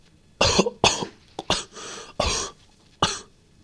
Breathless Coughing: a young adult male coughing and panting weakly after being choked.
This was originally recorded for use in my own project but I have no issues with sharing it.